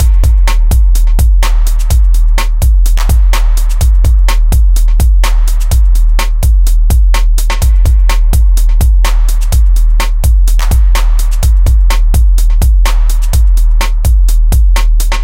A breakbeat I made in Jeskola Buzz which loops at 126bpm.